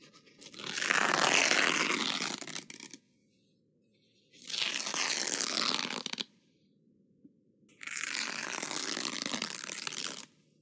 Kitchen paper ripping
Paper kitchen being ripped. Loud.
kitchen, paper, rip